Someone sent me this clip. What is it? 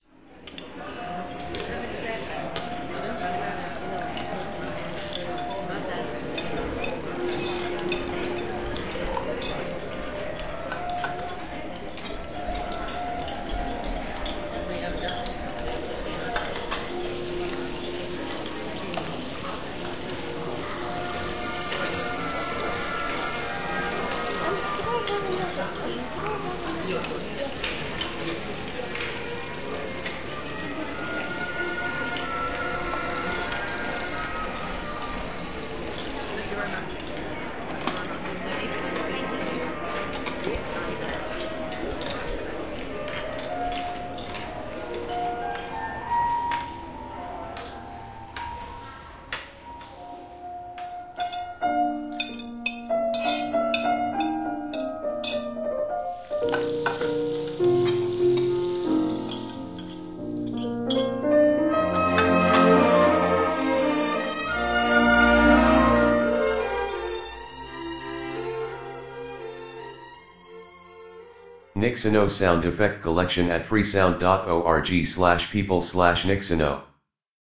Restaurant ambience with classical music
5 sound line : 2 line restaurant + 1 line fx (water, cooking &...) + 1 line white noise + 1 line music
recorded by Blue spark mic and Steinberg UR22 sound card
Reverb and 30 Bond EQ plugin
Restaurant, amb, ambiance, ambience, ambient, atmo, atmos, atmosphere, atmospheric, background, background-sound, cafe, cinematic, classical, fx, general-noise, kitchen, music, noise, soundscape